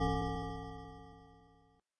Synthesized clock chime. Made using Audacity.
I tried to get the sound as close as I could remember to my grandmother's old grandfather clock.